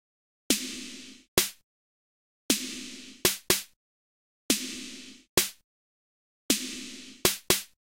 minimal drumloop just snare
acid, beats, club, dance, drop, drumloops, dub-step, electro, electronic, glitch-hop, house, loop, minimal, rave, techno, trance